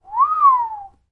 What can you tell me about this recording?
Raw audio of an awe whistle - the sort of whistle you would make if you saw something incredible that takes your breath away. Recorded simultaneously with the Zoom H1, Zoom H4n Pro and Zoom H6 (Mid-Side Capsule) to compare the quality.
An example of how you might credit is by putting this in the description/credits:
The sound was recorded using a "H1 Zoom recorder" on 17th November 2017.